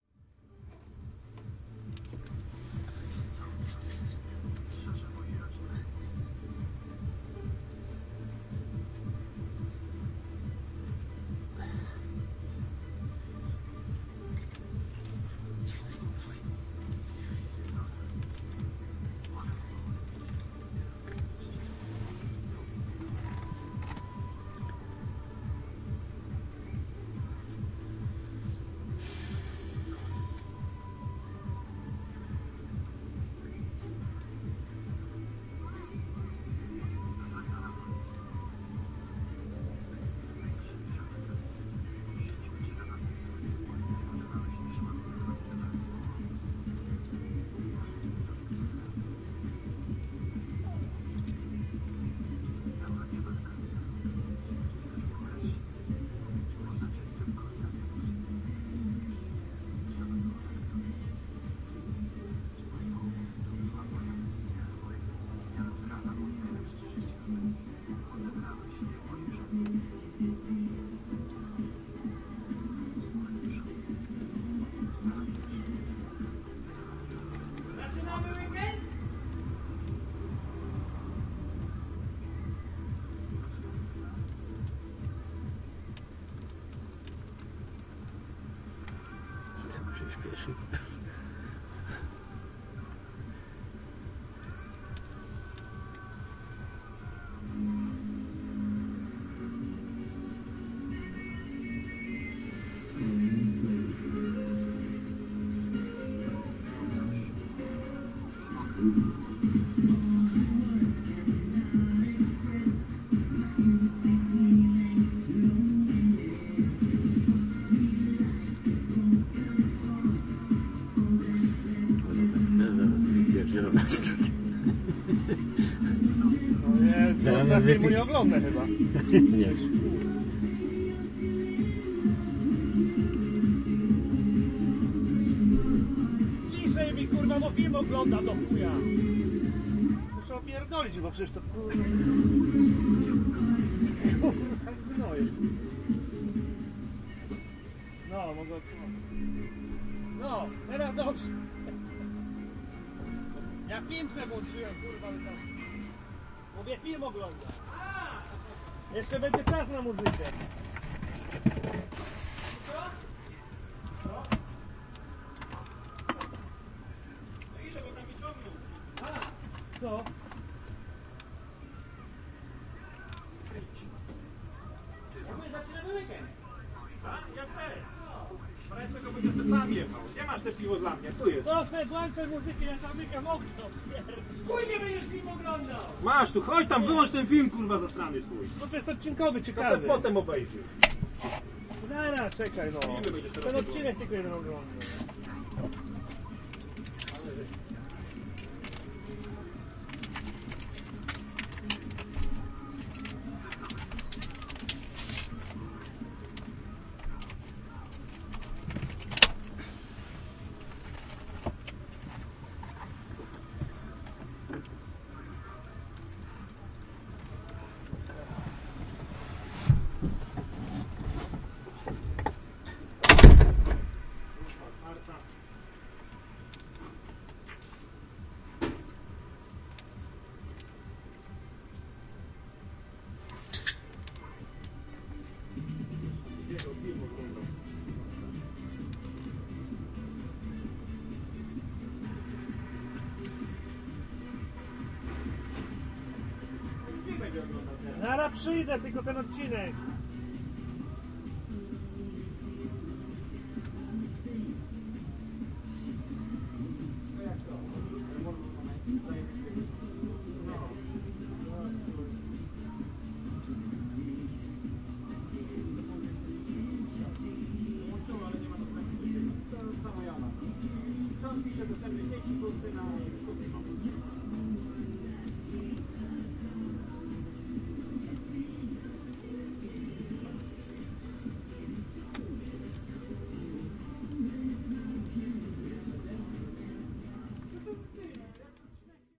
110805-pause in oure ambience1
05.08.2011: sixth day of the ethnographic research project about truck drivers culture.Oure in Denmark. the first day of the tree-day pause. ambience of "truck drivers camp" in front of the fruit-processing plant. there were 6 trucks. sounds of music, movie watching on computer, my truck driver breath, some talks between drivers, hiss of the can of beer.
movie, talks, field-recording, truck-cab, series, denmark, body-sound, film, oure